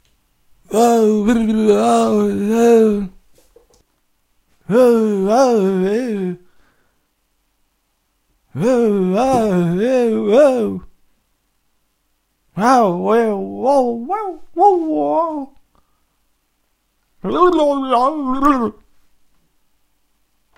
dazed and confused voice
I´m making some weird "dazed" sounds (as in a cartoon). Terrible fail? Up to you to decide :D
astonished, character, clear, confused, confusion, daze, de, design, element, fantasy, focusrite, forte, high, magical, male, mouth, nt1-a, quality, r, raw, recording, sound, speech, unprocessed, utterance, versatile, vocal, voice, weird